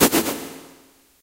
processed brush drum created from sotware synthesizer
brush, drum, rustle, soft, synthetic